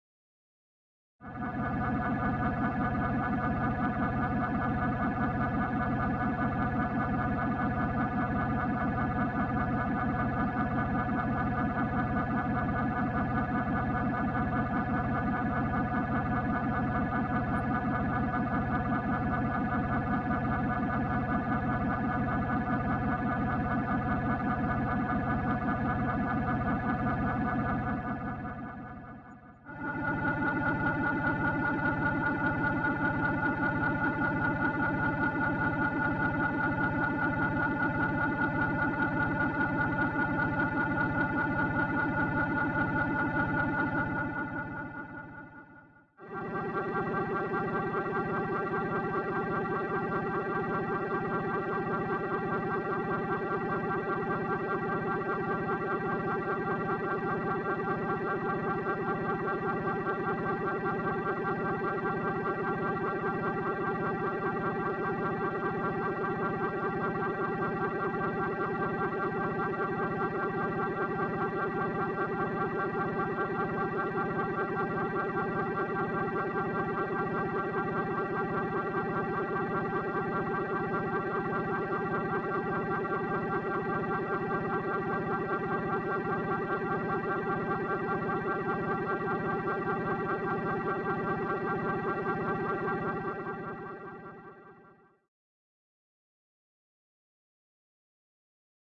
A synthetic synth sound in a few different frequencies
dgiital, sound, synth, synthesizer